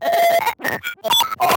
Samples from a FreakenFurby, a circuit-bent Furby toy by Dave Barnes. They were downsampled to 16-bit, broken into individual cues, edited and processed and filtered to remove offset correction issues and other unpleasant artifacts.